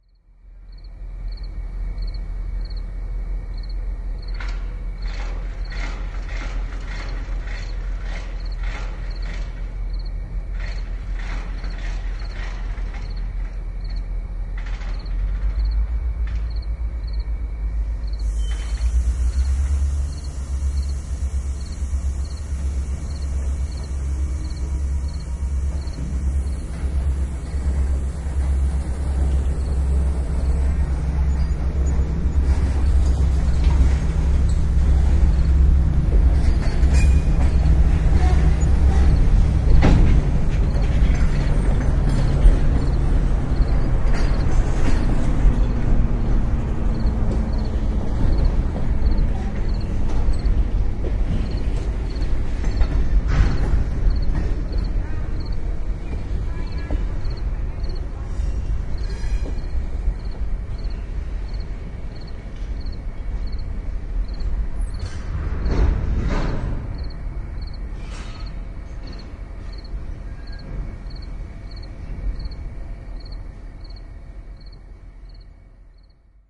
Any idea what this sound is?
Train at Ford Plant 1
Stereo recordings of a train in the train yard at the Ford Assembly Plant in St. Paul, MN. Recorded with a Sony PCM-D50 with Core Sound binaural mics.
engine, field-recording, industrial